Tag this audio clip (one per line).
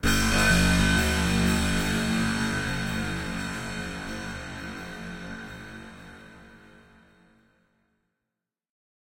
Atmosphere
Ambience
Cinematic
Scary
Amb
Wind
Fantasy
Sound-Design
Horror
Ambient
Strange
Drone
Sound
Movie
Ambiance
Environment
Creepy
Sci-Fi
Eerie
Trains
Spooky